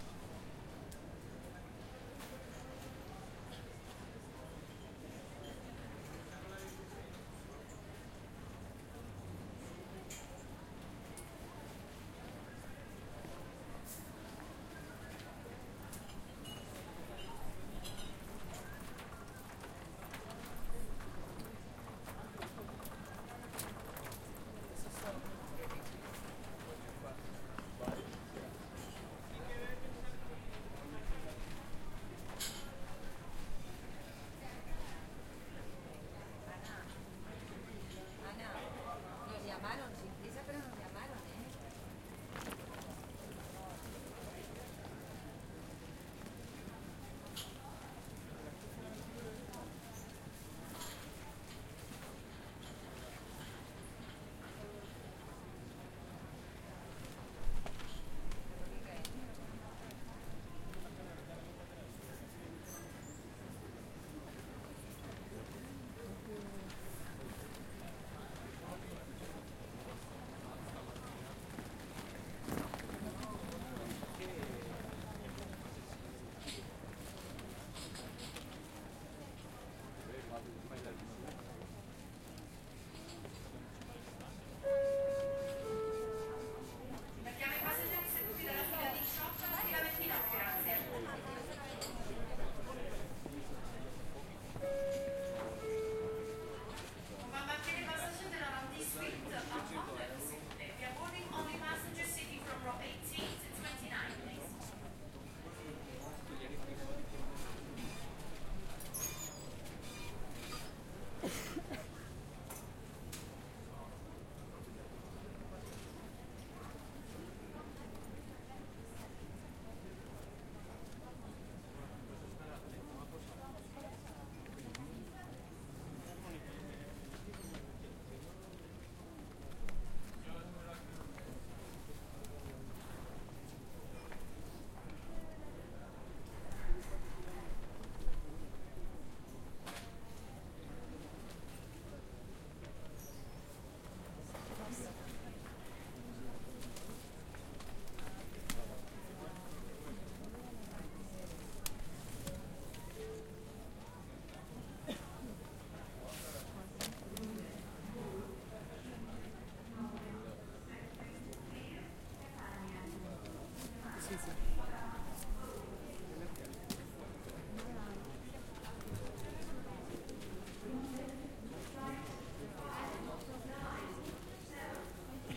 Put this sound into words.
Atmos int Airport Venice Hall
Venice, airport, lounge, Italy, Atmos